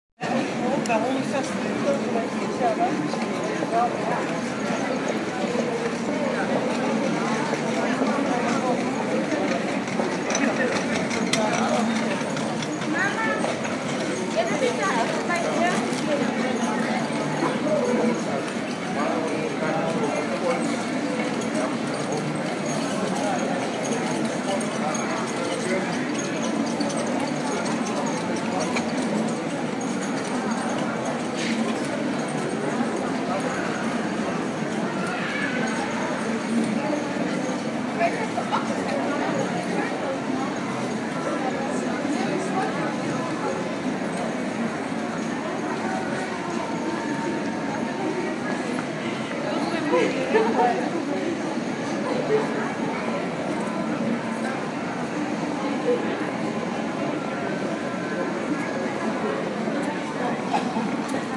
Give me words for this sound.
Hoogstraat-Rotterdam
People shopping in and around Hoogstraat (= highstreet) in the centre of Rotterdam. It's a pedestrian zone, so you hear no cars or even bikes.
ambience, city, field-recording, people, street, urban